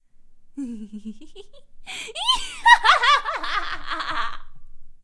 Woman Yandere Laugh
Me laughing insanely.